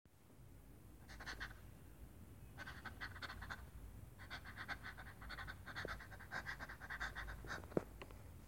Cachorro jadeando
Cachorro, jadeando, animal